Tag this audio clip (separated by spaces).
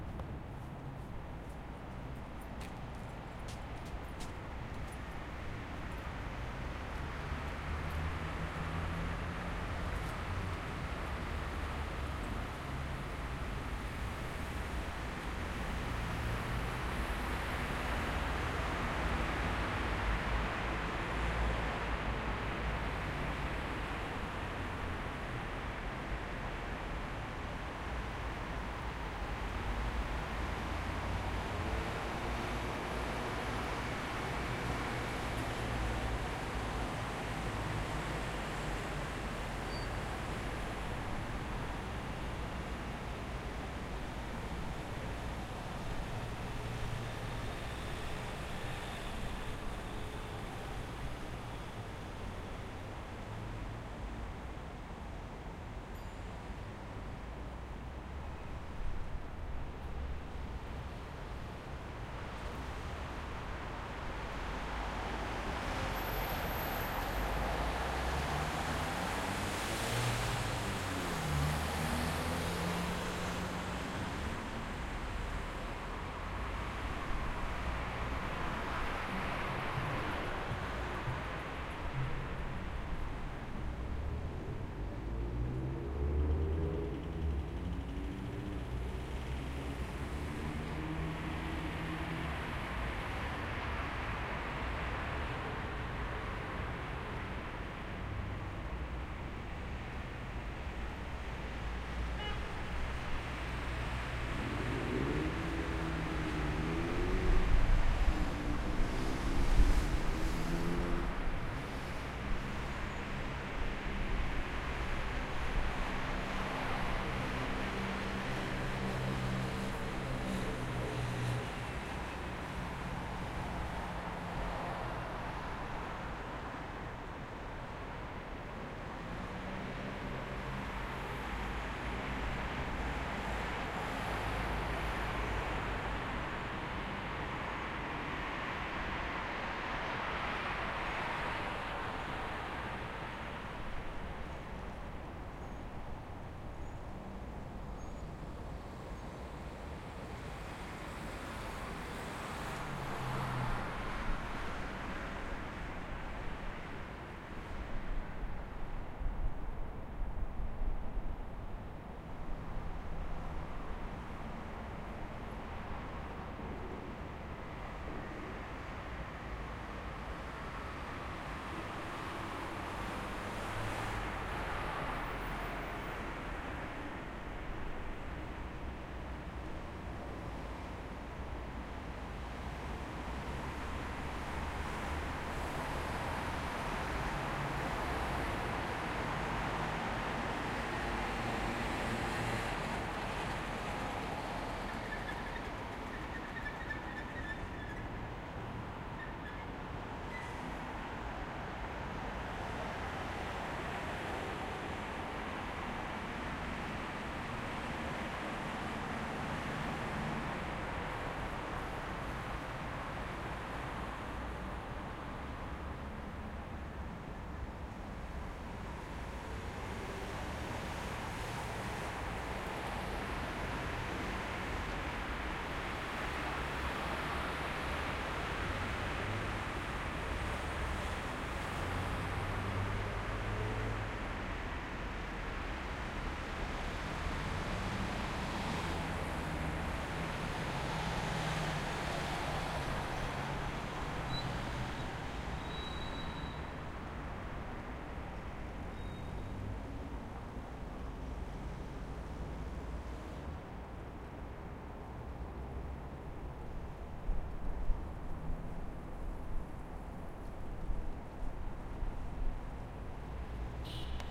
120 city field medium recording traffic xy